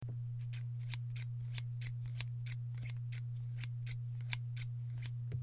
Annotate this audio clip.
Opened and closed the scissors a few times to create the noise